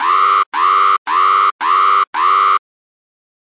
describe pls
2 alarm long e
5 long alarm blasts. Model 2
futuristic, alarm, gui